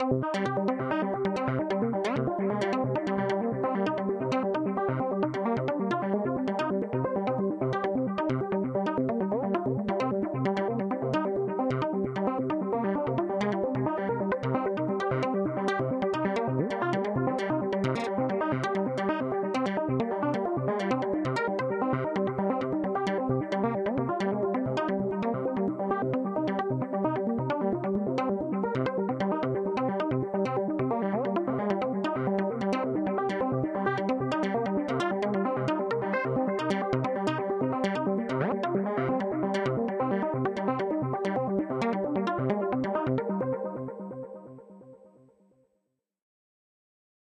Semi-generative analog synth sequence (with delay) in random keys.
One of a set (a - h)
Matriarch self-patched & sequenced by Noodlebox
minimal post-processing in Live
132bpm, arp, electronic, loop, melody, modular, psychedelic, sequence, stereo, synth, synthesizer, techno, trance